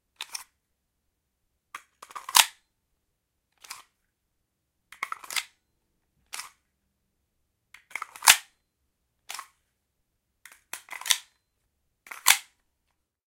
Handgun / Pistol Removing Mag and Inserting Mag Foley
Various attempts at removing an empty magazine and inserting an empty magazine into a pistol. Little room echo due to issues, sorry about that.
RECORDED USING THE ZOOM H5 FOUR-TRACK PORTABLE RECORDER
EDITED USING AUDACITY